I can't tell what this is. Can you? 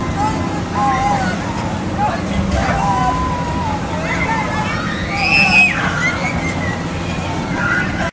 newjersey OC wonderscreams
Screaming at Wonderland Pier in Ocean City recorded with DS-40 and edited and Wavoaur.
ocean-city, ambiance, field-recording, wonderland